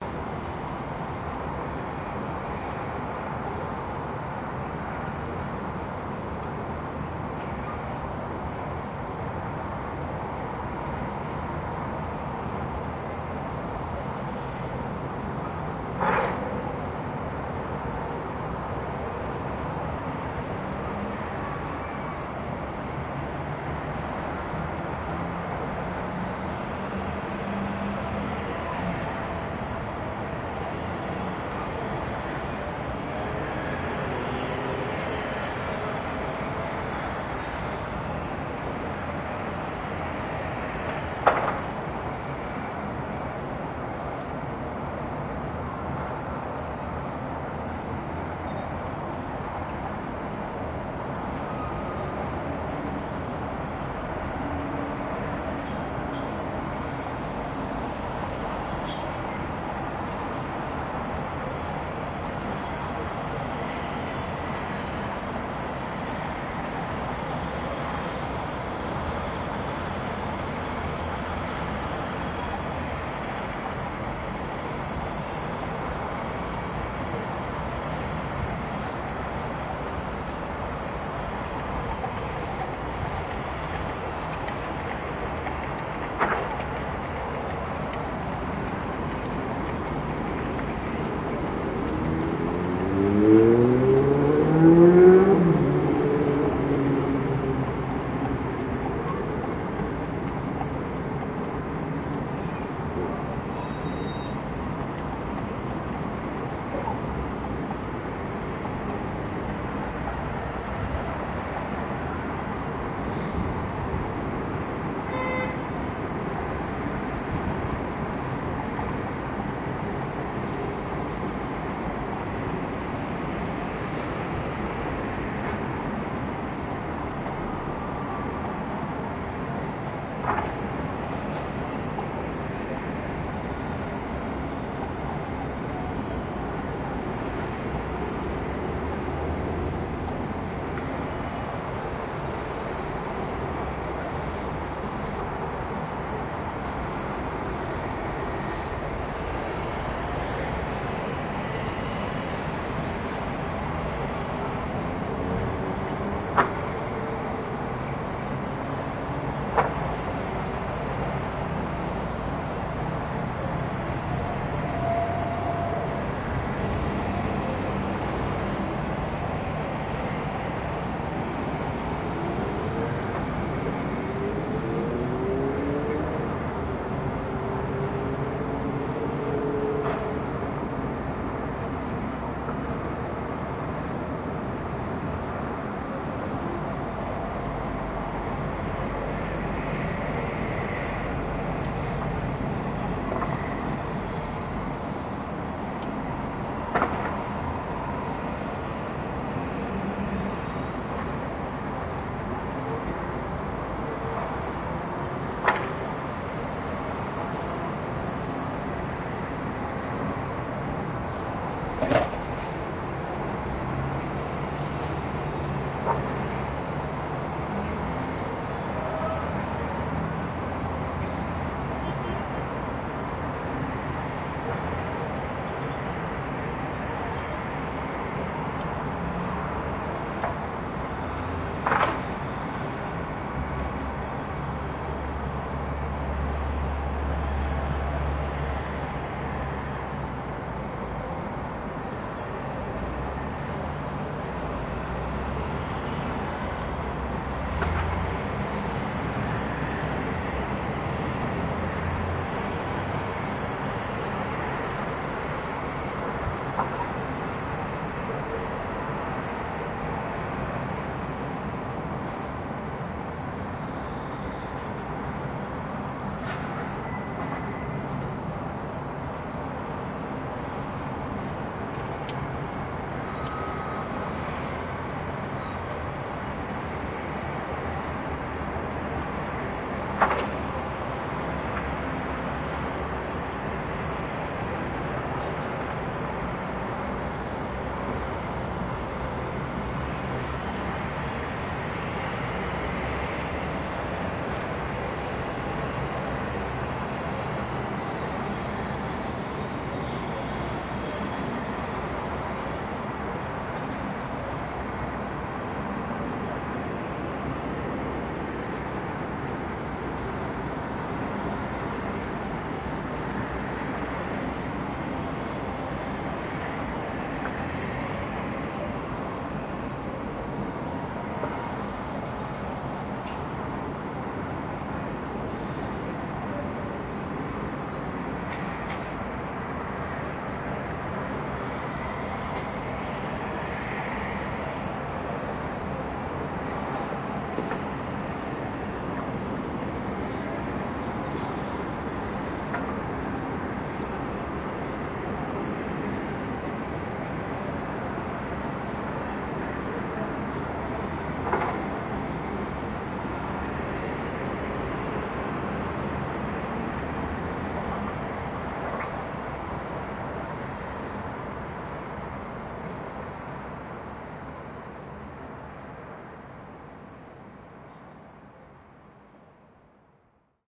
Urban street sounds taken outside my window on the 17th floor, recorded with a Sony Cyber Shot HX-9 camera.